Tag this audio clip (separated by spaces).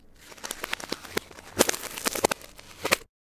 crack step freeze frost sound foot walk field-recording footstep effect cold frozen snow ice BREAK winter